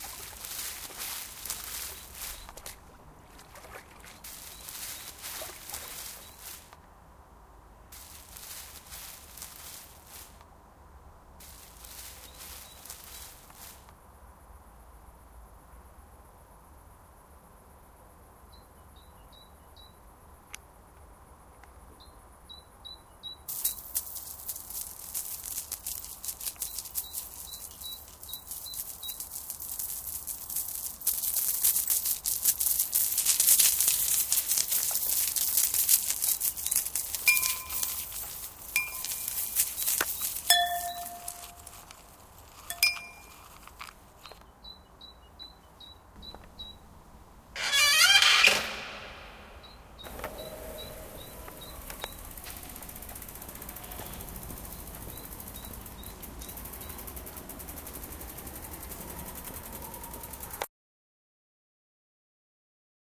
SonicPostcard WB HaticeZoe
Here's the SonicPostcard from Hatice & Zoë , all sounds recorded and composition made by Hatice & Zoë from Wispelbergschool Ghent Belgium
wispelberg, cityrings, ghent, belgium, sonicpostcards